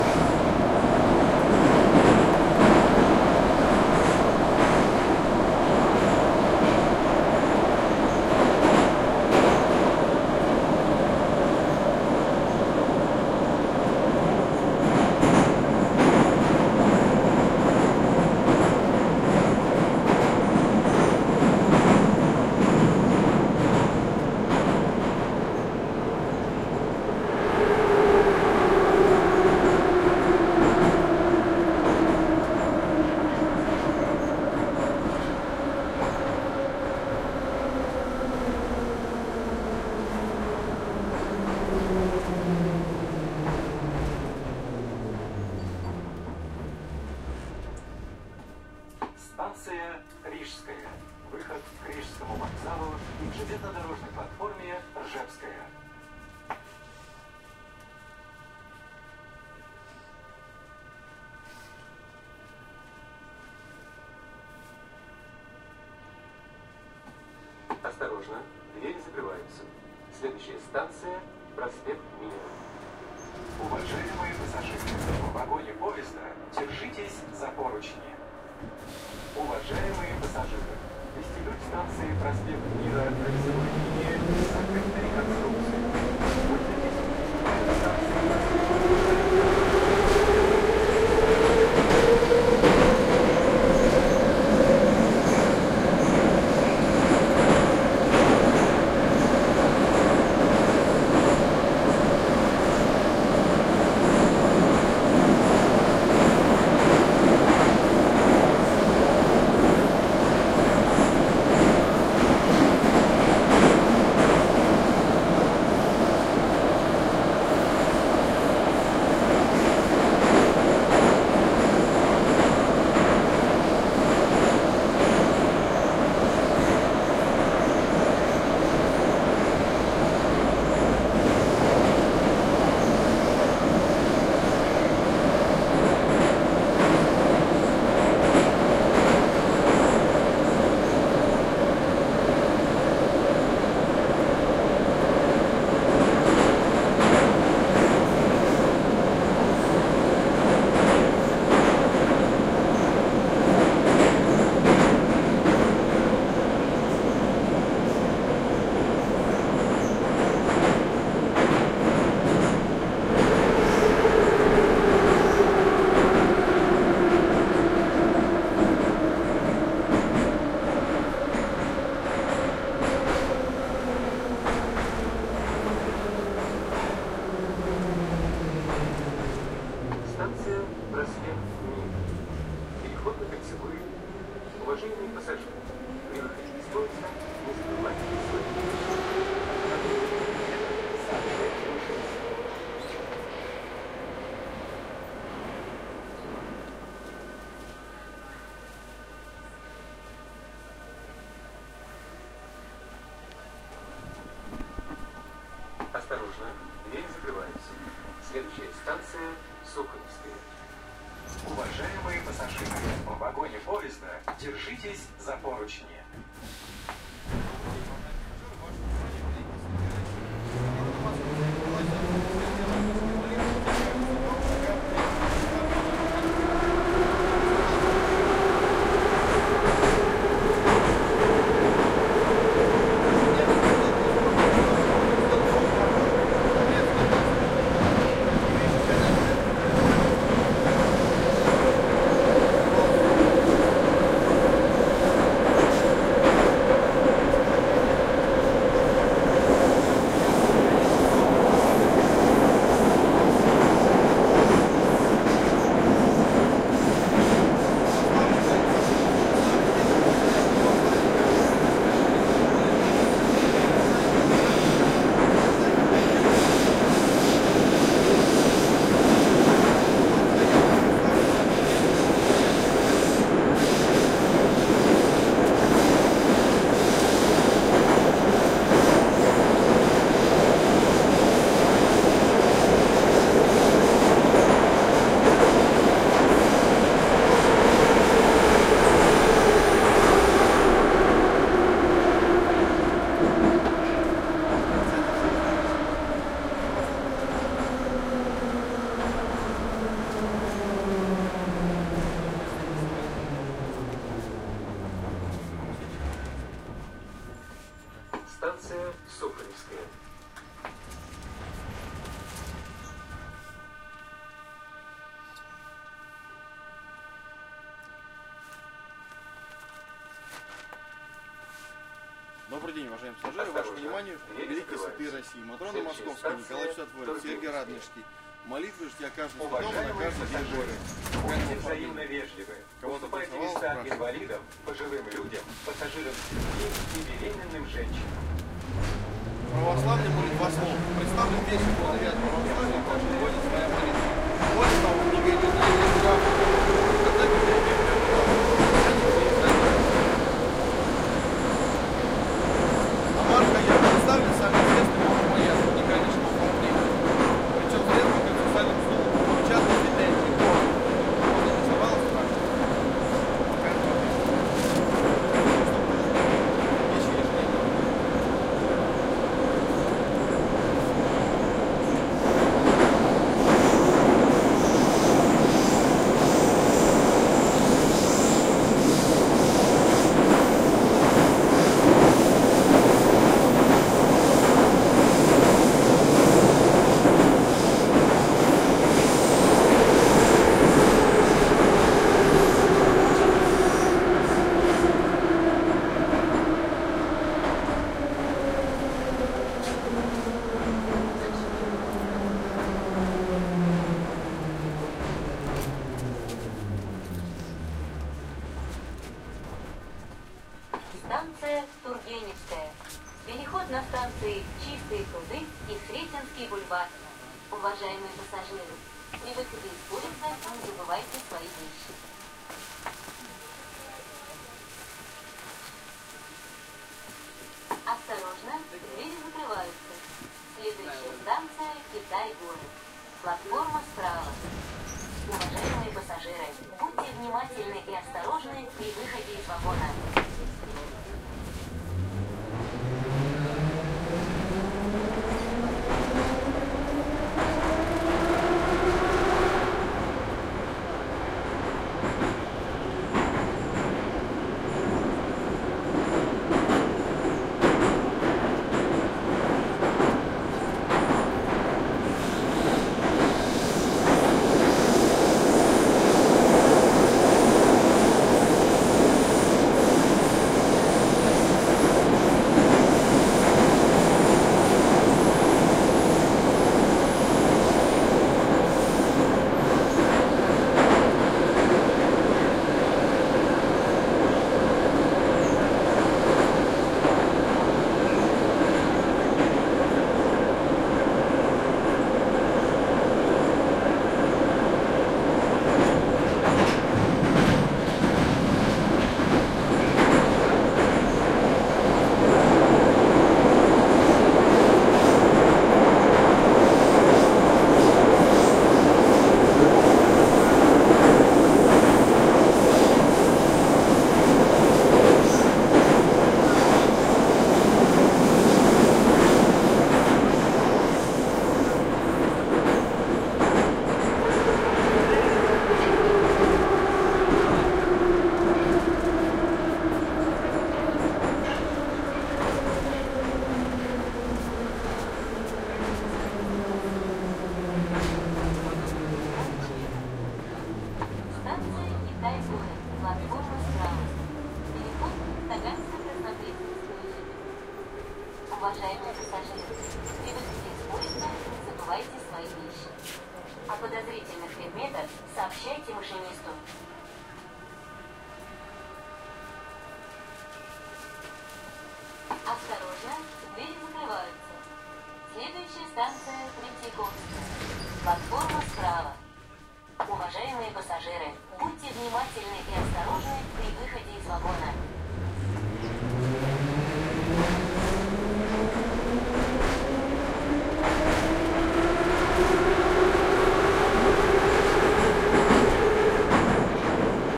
Moscow subway train ride - interior ambience - Kaluzhsko-Rizhskaya subway line XY mics
Moscow subway train ride - interior ambience, people talking, intercom announcements, some guy trying to sell some books to passengers.
Made with Roland R-26's built-in XY mics.
announcement, field-recording, subway, train